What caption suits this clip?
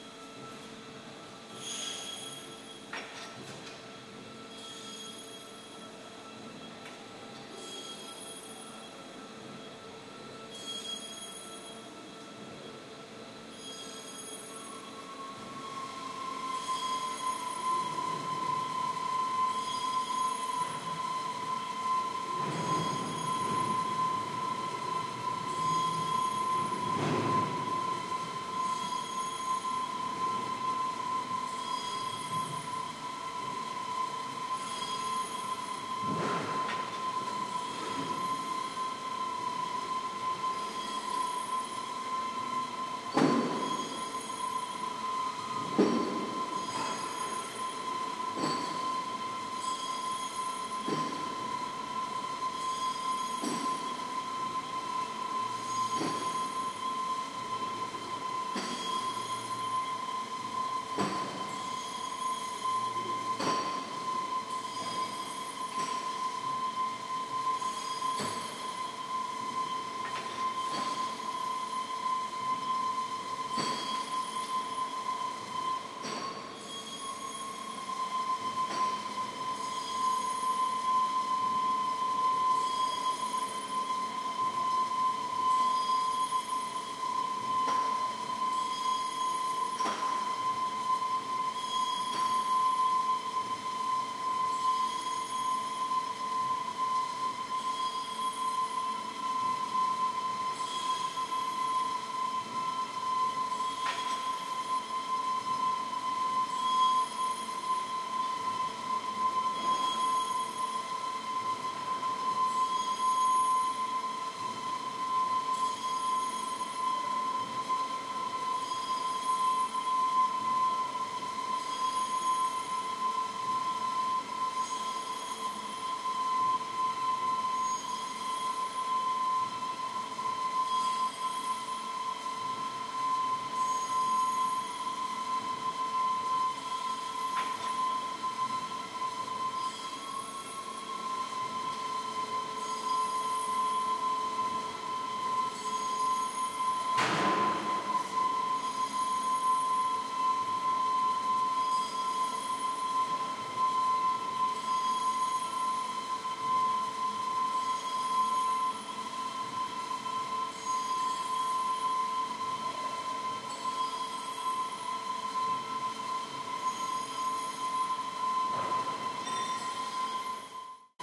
Industrial factory working 03
Sound full of industrial sounds, from hard machines to spectacular noises. Sound was recorded in a gun factory in Czech Republic.
The size of hall adds a natural reverb effect.
Recorded with Tascam DR 22WL.
ambience, factory, field-recording, fuss, gun, industrial, machine, machines, noise, production, racket, steel